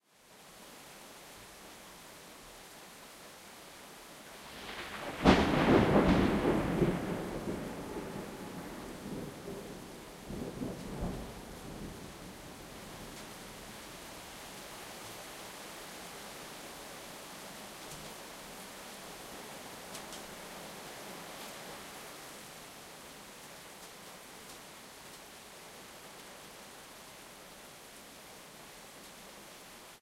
Lightning Storm
lightning and heavy rain
lightning, storm, weather